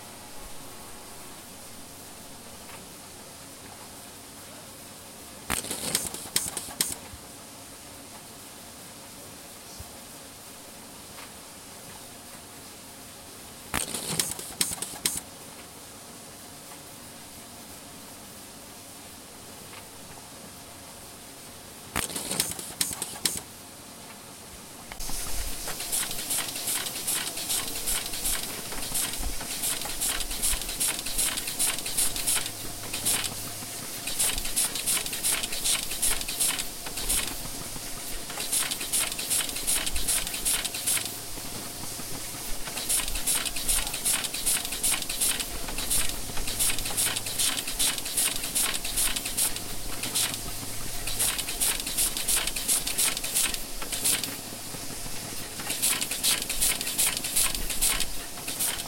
Microchip Sorting Testing
Automated testing and sorting of microchips. Recorded with Tascam DR-40, internal mics.
factory, microchips, sorting